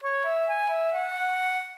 These sounds are samples taken from our 'Music Based on Final Fantasy' album which will be released on 25th April 2017.
Flute, Music-Based-on-Final-Fantasy, Reed, Samples